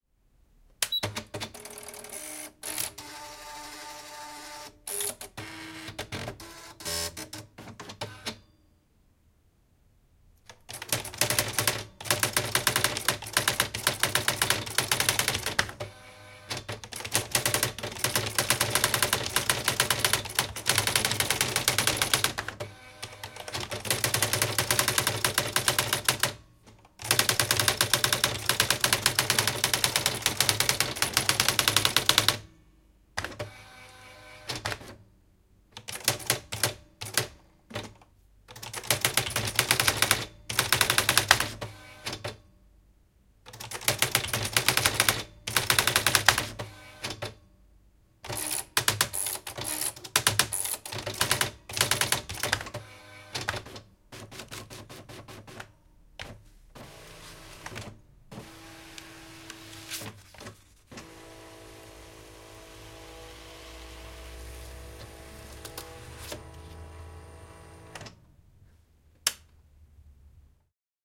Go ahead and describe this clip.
Interaction with Olympia Carrera De Luxe electronic typewriter.
0'00" Boot-up sequence
0'10" Typing
0'33" Isolated CR/LF
0'35" A few isolated keystrokes
0'38" Some more typing
0'47" Correction tape operation
0'54" Line feeds
0'56" Form feed
1'09" On/off switch flip